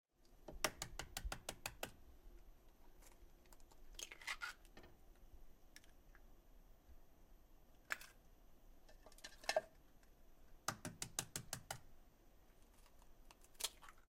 cracking egg on pan
Cracking an egg onto a pan for frying.